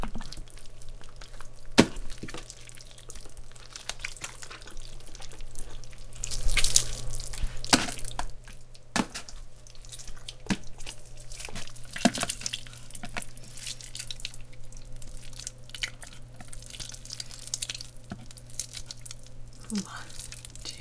handling raw chicken1
Separating a whole chicken
chicken, juicy, meat, raw, sloshing, squishing